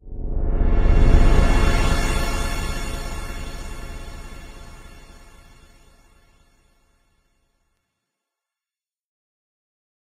magic wand
nice soft hit from space
space, mystical, hit